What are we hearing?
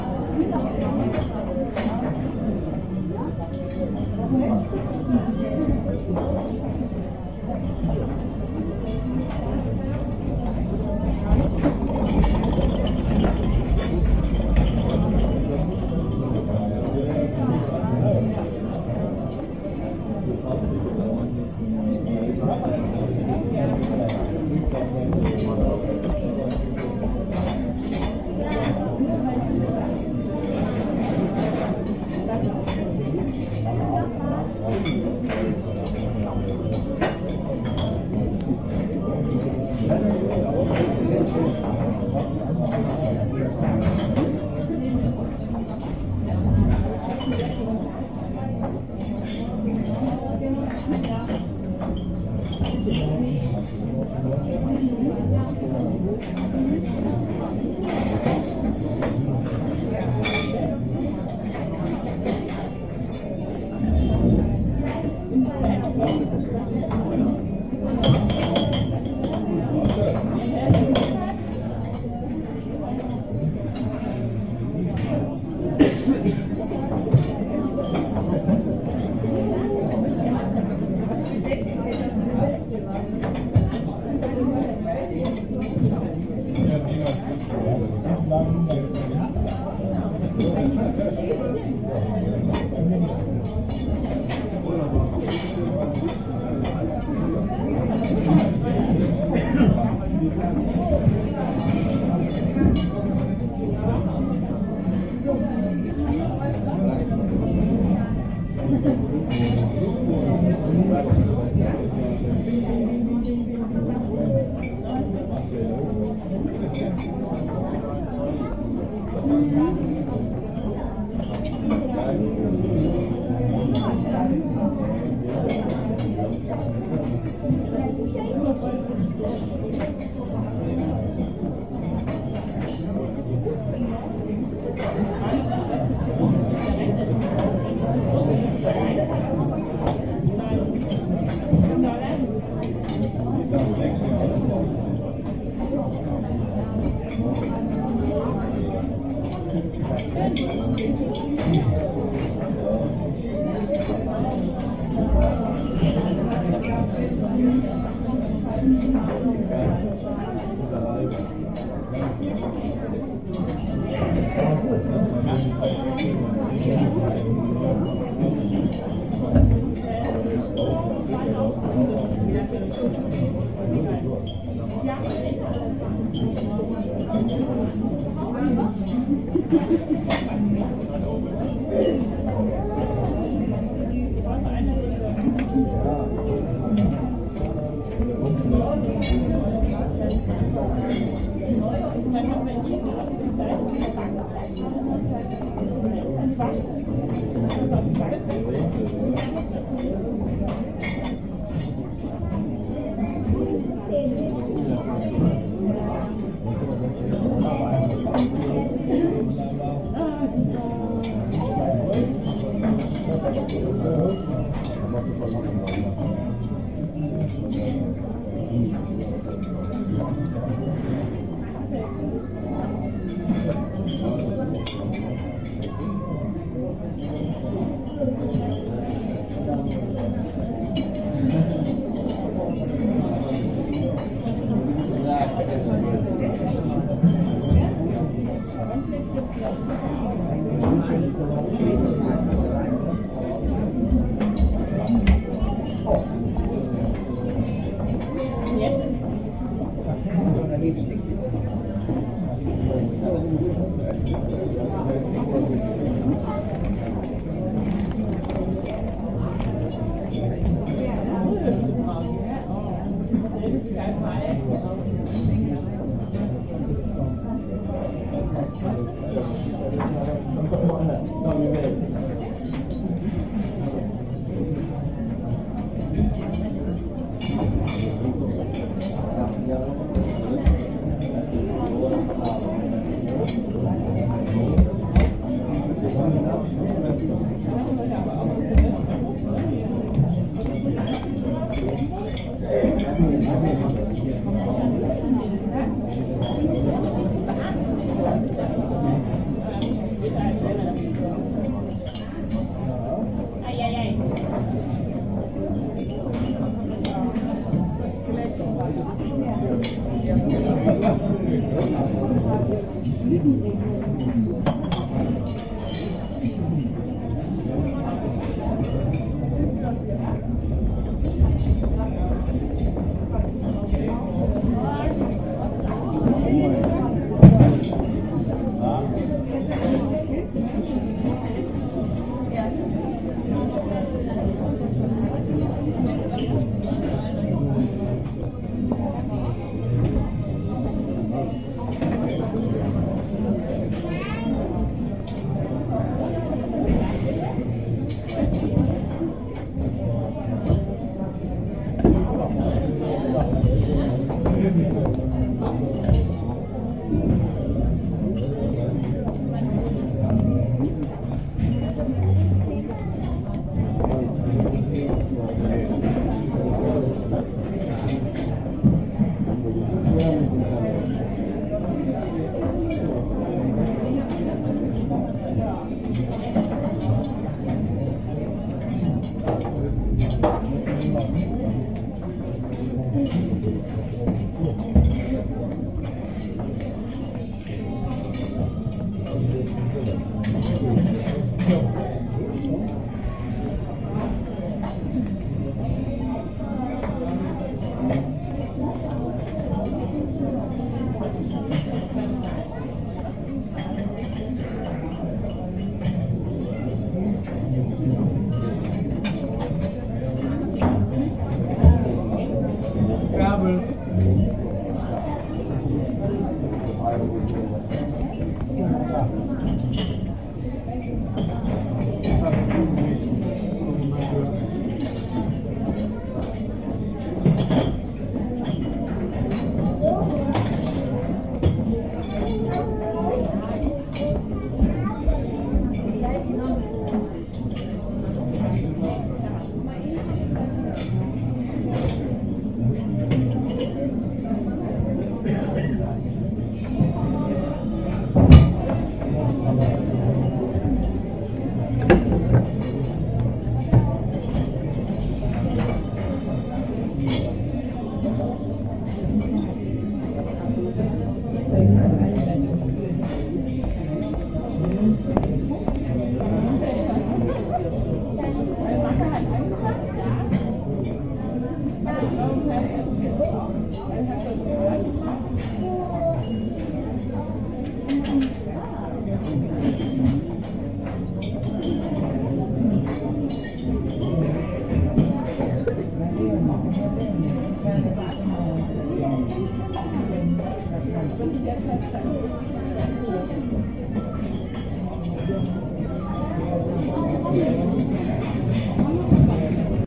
Cafeteria/Dining Place Ambience
Dining place ambience with chattering and utensils. Recorded during breakfast in a hotel in München, Germany.
cafeteria, crowd, dining, field-recording, people, talking